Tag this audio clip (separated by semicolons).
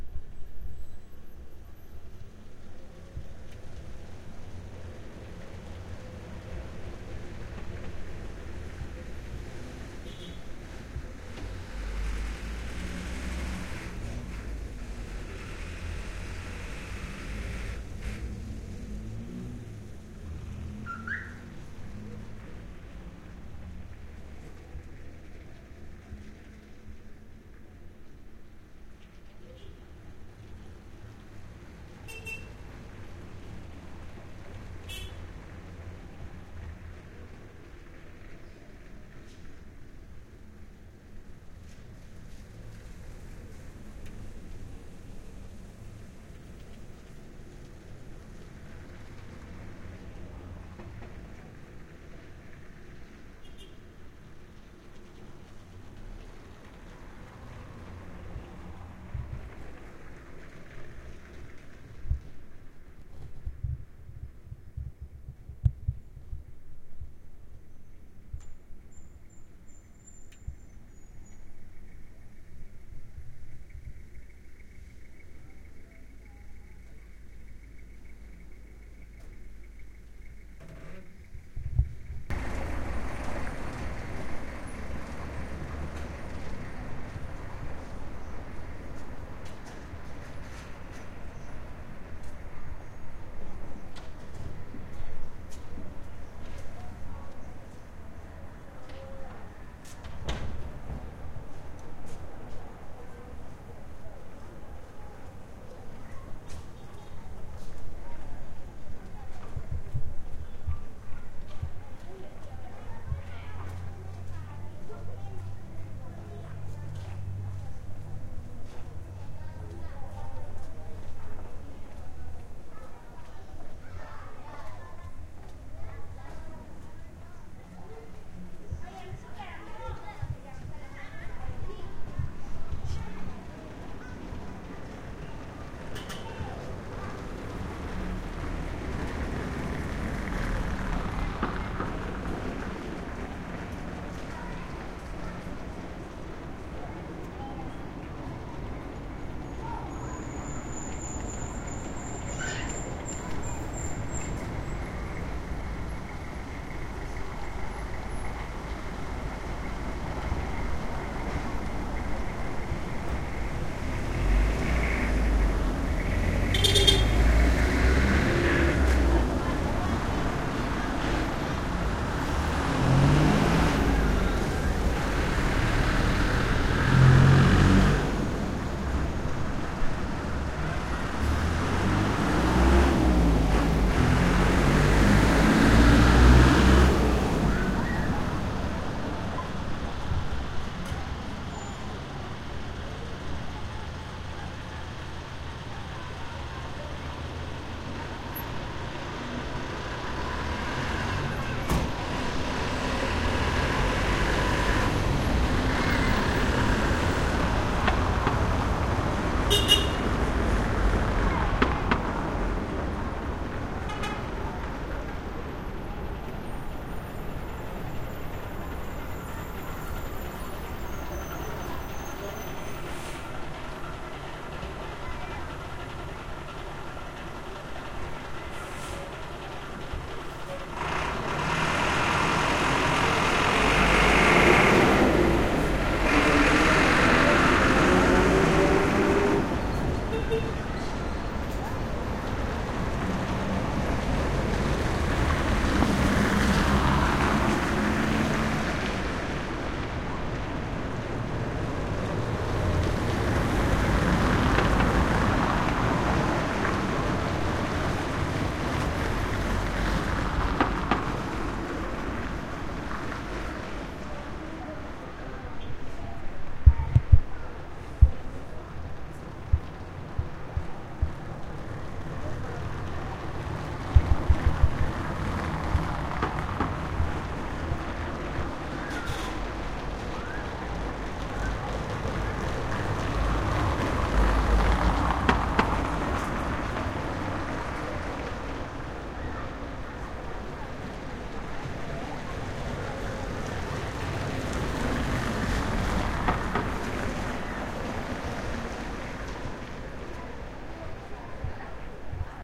cobblestone; Cusco; People; Peru; Traffic